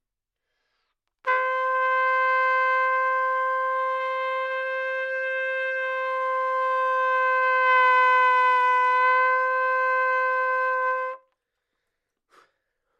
Trumpet - C5 - bad-pitch
Part of the Good-sounds dataset of monophonic instrumental sounds.
instrument::trumpet
note::C
octave::5
midi note::60
good-sounds-id::2886
Intentionally played as an example of bad-pitch